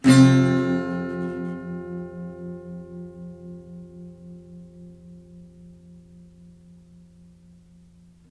A full octave of basic strummed chords played on a small scale student acoustic guitar with a metal pick. USB mic to laptop.